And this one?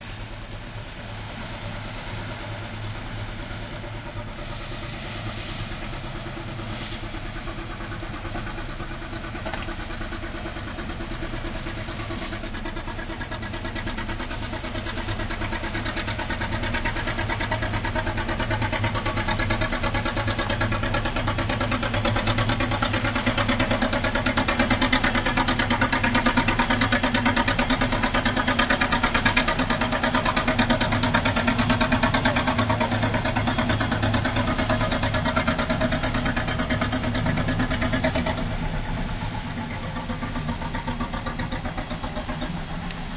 A boat with a machine is coming to spread net for fishing. Stereo recording. A Sony Handycam HDR-SR12 has been used. The sound is unprocessed and was recorder in Greece, somewhere in Peloponnese.
boat, boat-with-machine, fishing